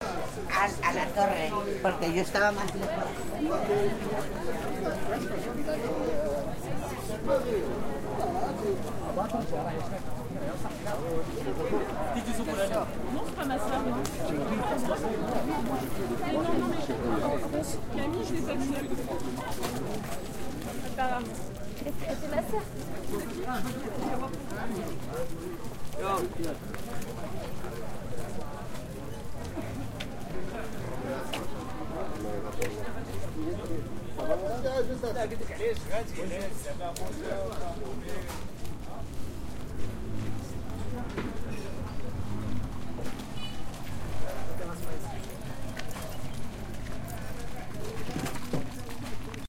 Marrakesh Street Ambience 4
Street ambience in Marrakesh
ambience, ambiance, city, marrakesh, marrakech, general-noise, soundscape, field-recording, ambient